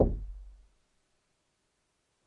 Door Knock - 21
Knocking, tapping, and hitting closed wooden door. Recorded on Zoom ZH1, denoised with iZotope RX.